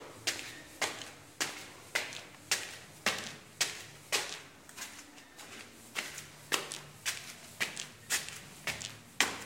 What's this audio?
Going upstairs
upstairs, walking, ladder, legs